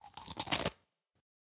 That delicious crunchy sound!